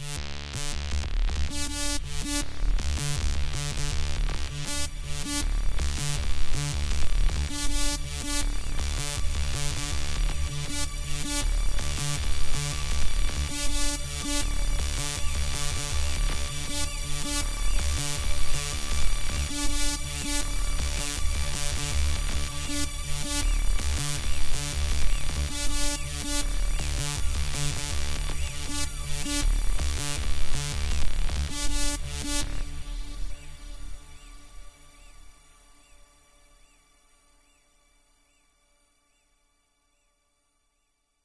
Galactic Knights Begleit 2
Empire, Knights, Jedi, Galactic